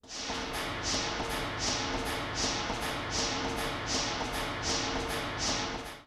XERFAN DE OLIVA Ana Beatriz 2015 2016 Factory
THEME - A Night at the Asylum: sounds that drive you crazy
This sound was created based on a recording which contained sounds of scraping, tapping and footsteps. These individual sounds were identified at different points of the track, isolated and placed together to create this rhythmic piece. Their speed was decreased and reverb was added. This resuted in a mechanical, factory ambient sound
Typologie:
X: Continue Complexe
Masse: Groupe Nodal
Timbre harmonique: terne
Grain: rugueux
Allure: vibrato
Dynamique: abrpute et violent
Profil mélodique: scalaire
Profile de masse: site
engine, factory, hypnotic, industrial, machine, mechanical, steam, warehouse